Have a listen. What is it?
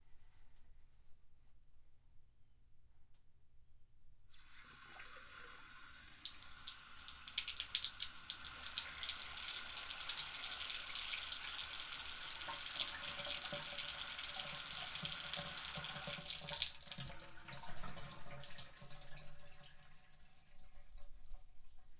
Bath Filling (No Plug)
Bathtub filling but I forgot to put in the plug so the water drained out and our drain is LOUD. Still could be a useful sound.
Be chill and use my sounds for something most non-heinous.
bath, fill, water